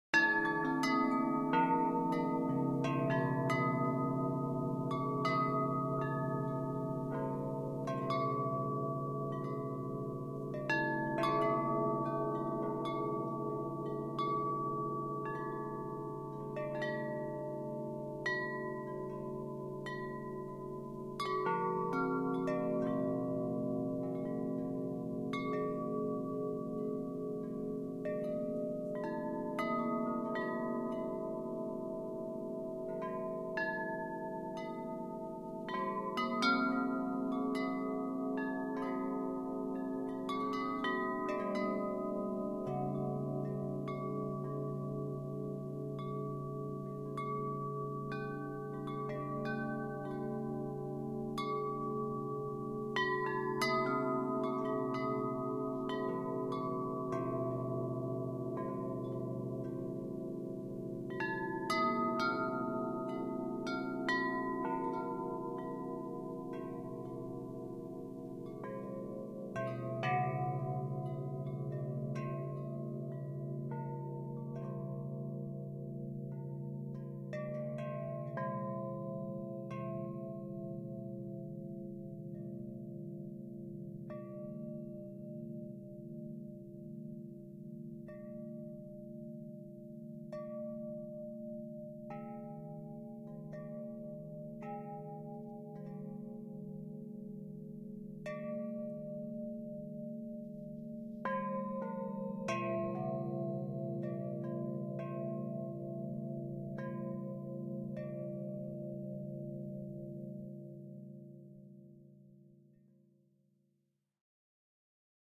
metal windchimes, beginning with a dense texture and gradually becoming more sparse, processed in Kontakt and edited in BIAS Peak
bell, chime, metallic, resonant, soundscape, windchime